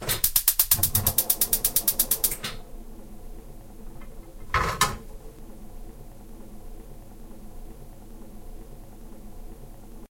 Gas putting pan
cook, frying, pan, food, gas, kitchen, cooking